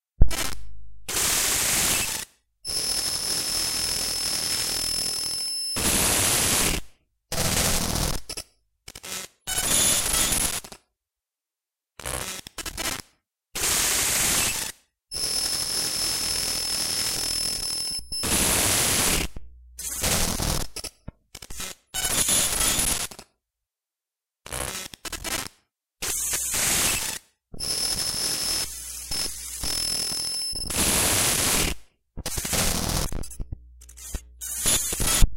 You take a game that you can put in standby (ie Golden Sun) and remove the cartridge then take it out of standby.